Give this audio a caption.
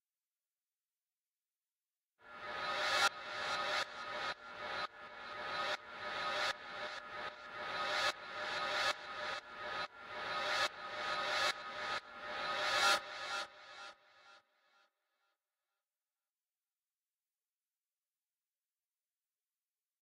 Stereo Echo ping-pong
Echo, FX, ping-pong, Stereo